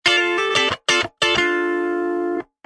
Recorded with Gibson Les Paul using P90 pickups into Ableton with minor processing.